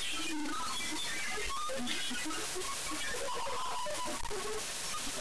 Captured during a bad attempt screen recording a game. See what you can come up with.